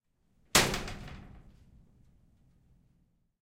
Metal Impact 1
The sound of kicking the wall inside a small metal shed.
Recorded using the Zoom H6 XY module.